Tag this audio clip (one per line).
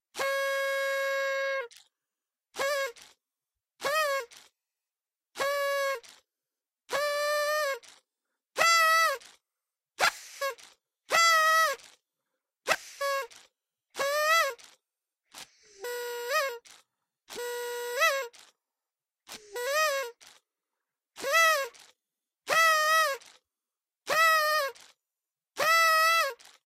festival
harsh
horn
party